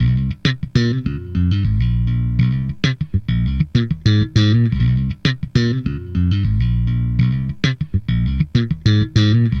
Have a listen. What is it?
SlapBass GrooveLo0p C#m 3
Funk Bass Groove | Fender Jazz Bass